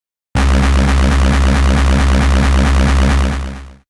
Resse 340bpm C1
Reese bass made in kontact, cycle at 340 or 170 or 85 bpm